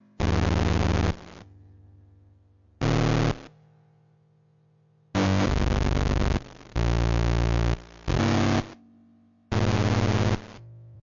circuit bent keyboard
bent, circuit